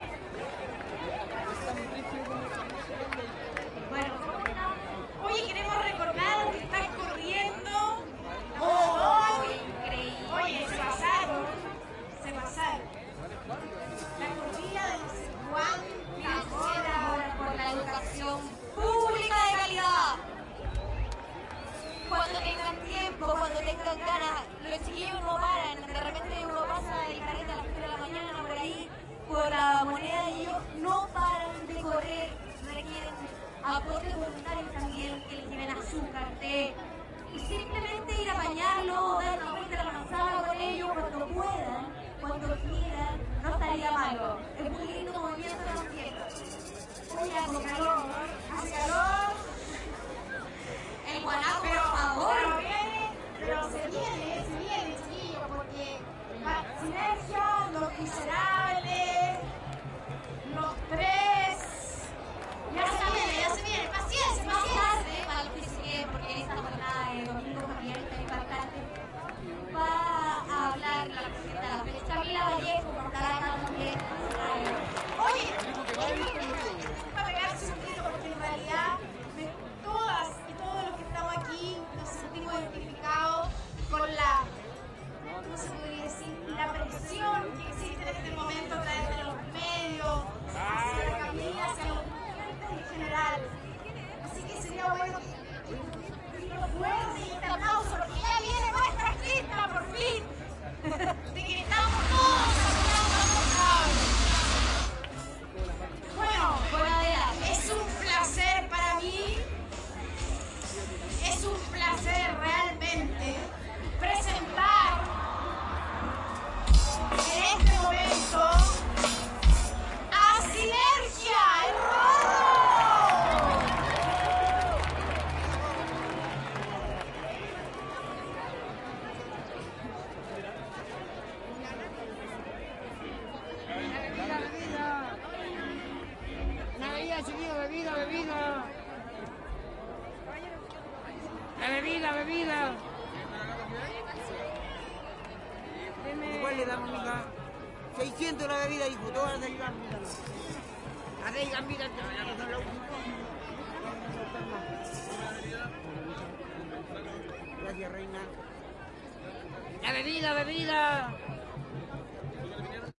domingo familiar por la educacion 03 - presentacion sinergia
animadora recuerda a los corredores por la educación
habla sobre la presion hacia camila vallejos
presentacion de sinergia
la bebida chiquillos